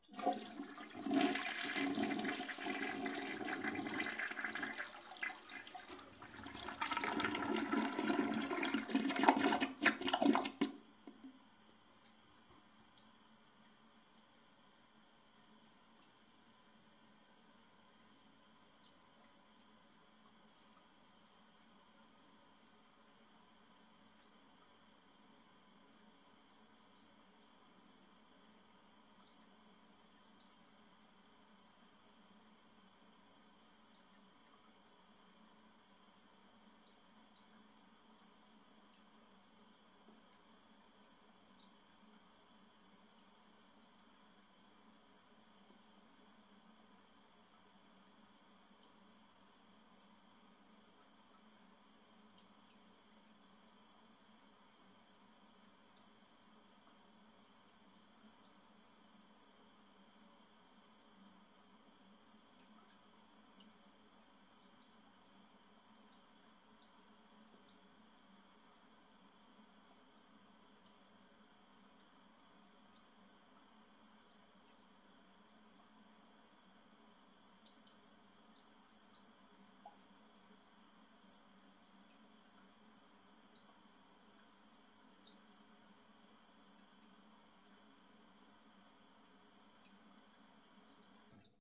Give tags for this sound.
bathroom; flush; running; toilet; water

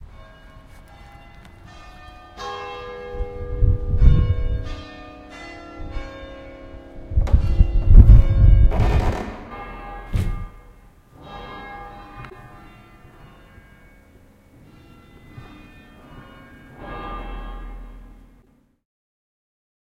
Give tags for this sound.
16; bit

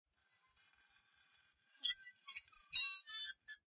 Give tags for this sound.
noisy; plate; glass; scrape

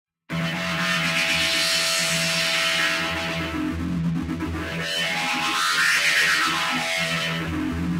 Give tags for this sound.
gated; rhythmic; gladiator; 120bpm; The-Prodigy; loop; techno; Pendulum; synthesized; electronic; growl; EDM

